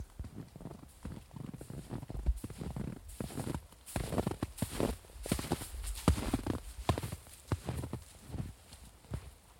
footsteps in snow 2
winter, footsteps, steps, snow